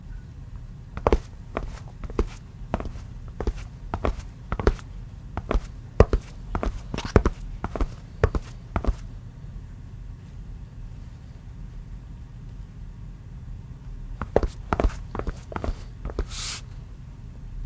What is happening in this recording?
walking footsteps tennis shoes tile floor 8
A man walking on tile floor in tennis shoes. Made with my hands inside shoes in my basement.